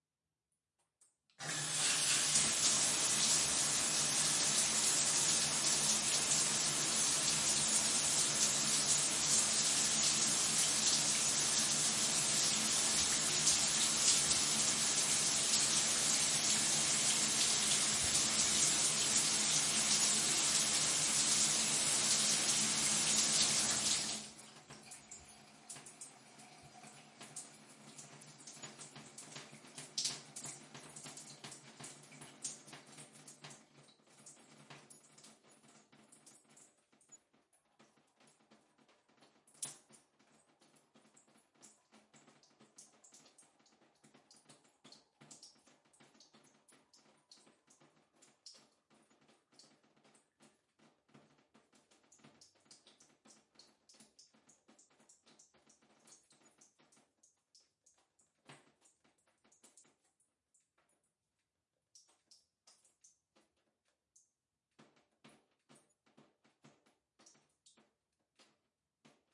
Shower Head 2 Run Stop Drip
Disperse head (soft stream) shower head in a shower stall. Water turns on, runs, shuts off, drips.
Cleaned up with iZotope RX 6.
AudioDramaHub, faucet, shower, water, drip, bath, bathroom